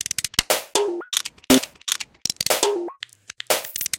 AbstractBeatsFull 120bpm02 LoopCache AbstractPercussion
Abstract Percussion Loop made from field recorded found sounds